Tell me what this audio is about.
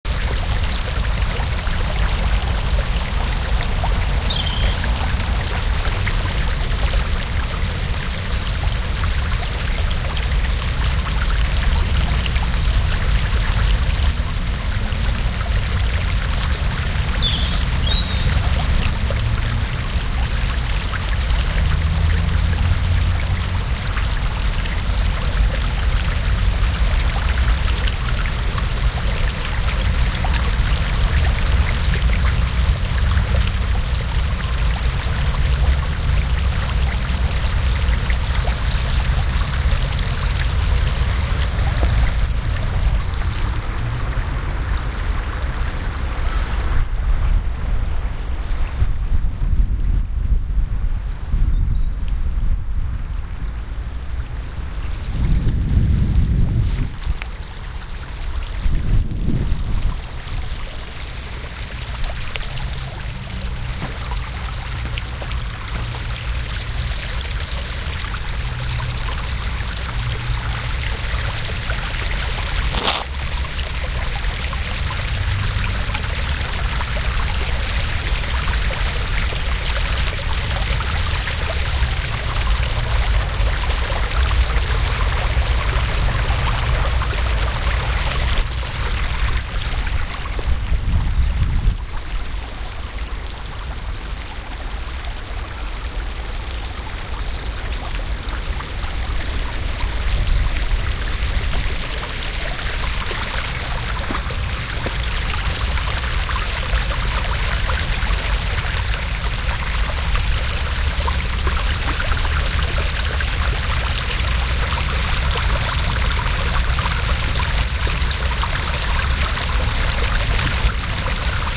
Regents Park - Waterfall